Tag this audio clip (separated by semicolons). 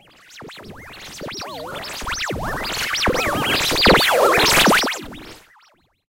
info; sci-fi; analog; digital; space; film; effect; soundtrack; retro; future; scoring; tlc; vintage; funny; cartoon; oldschool; radio; communication; synthesizer; fx; computing; soundesign; signal; movie; lab; synth-noise; commnication; spaceship; synth; soundeffect